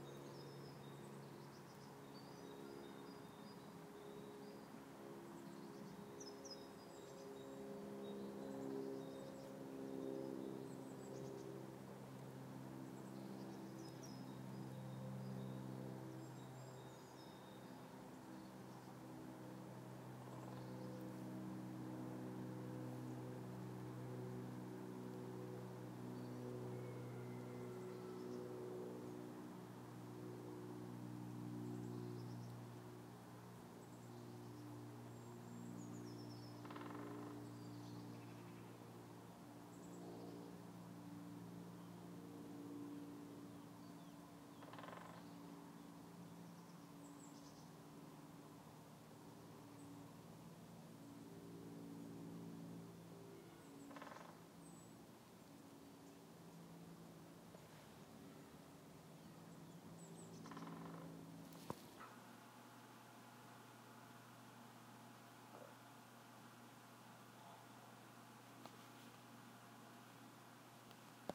forest near armhem
Recorded on iphone with Røde app. Forest, woodpecker, wind, airplane.
No filtering just plane recording.
far, nature, wind, woodpecker, airplane, field-recording, forest, away, birds